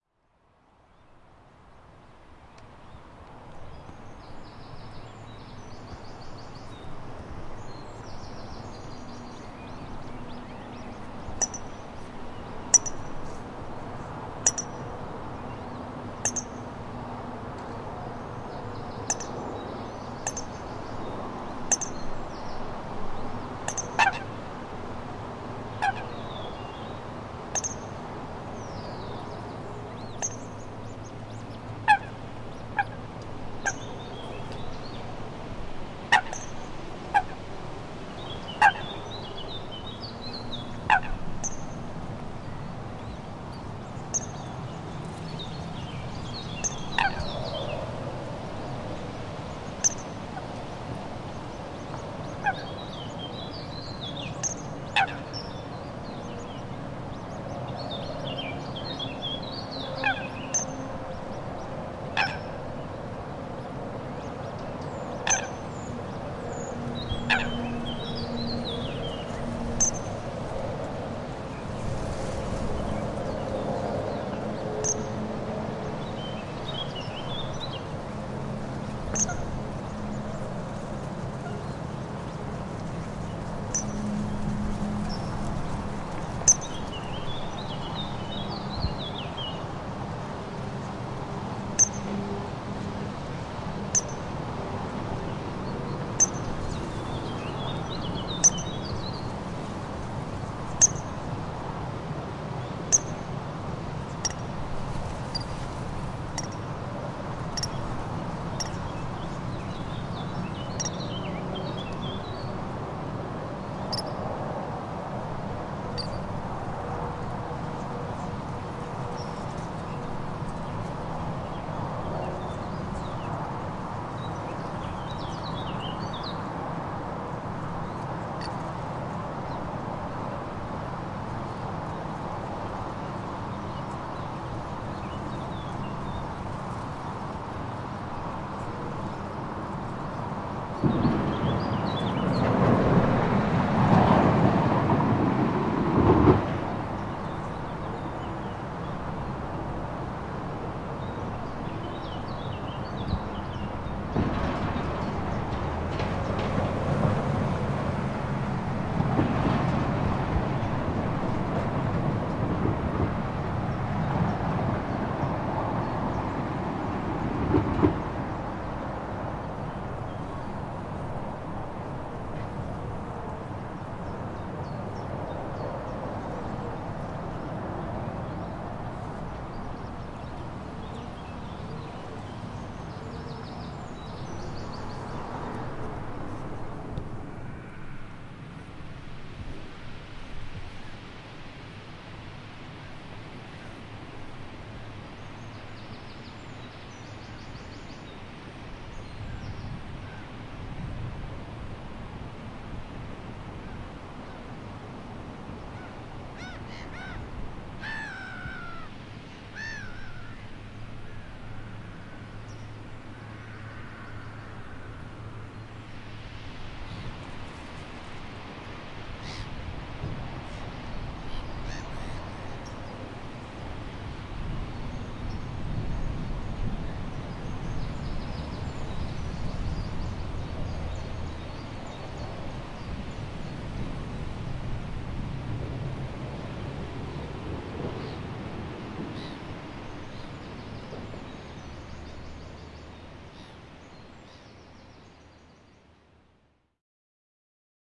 Moervaart met watervogels en Spanjeveerbrug
This is a recording of the Moervaart with some waterbirds tweeting and a car driving over the old bridge (Spanjeveerbrug). It was recorded on a Roland R-26 with a Sanken CS3e in the summer of 2014.
soundscape, atmosphere